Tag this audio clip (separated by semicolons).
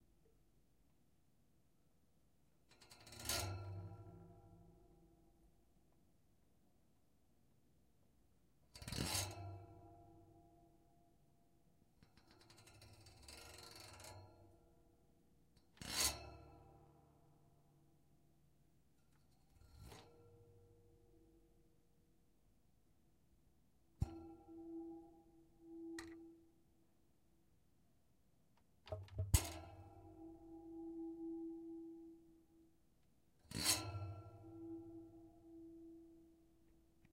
fan; Grate